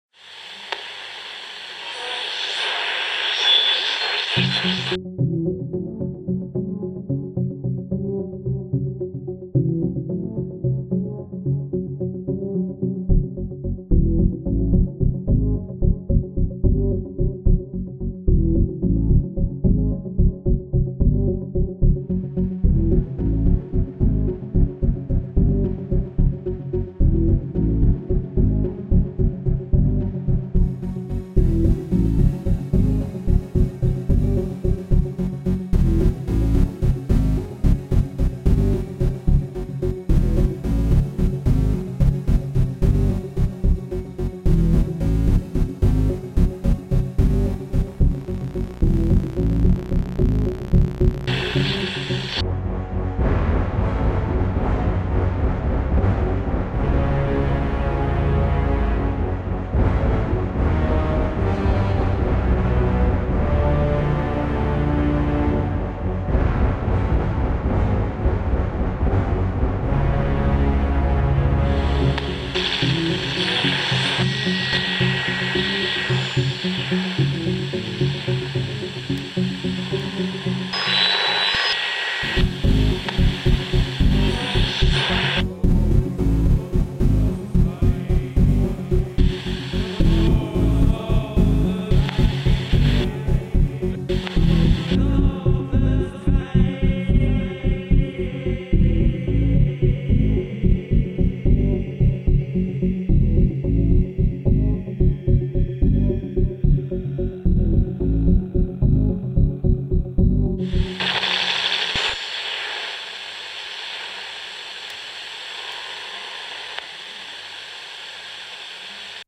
mexican shoes at the doors of sky
future, radio, sounds, space, star, SUN, wave